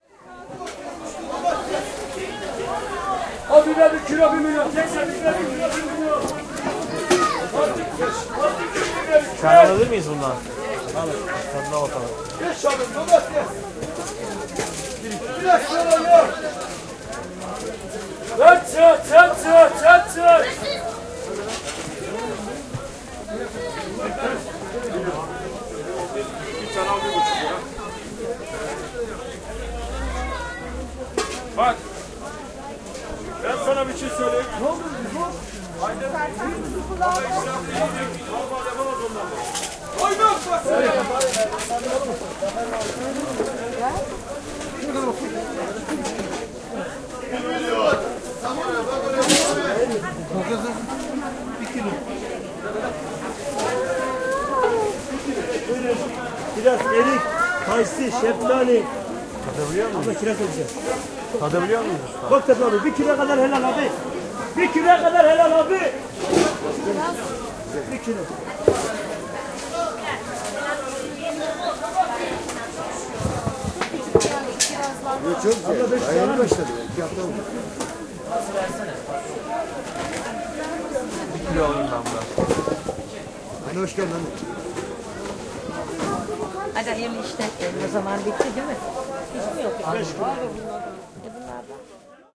stallholders sell their stuff
recorded Turkey/Istanbul/Fındıkzade Salı Pazarı/
baran gulesen
bazaar, stallholder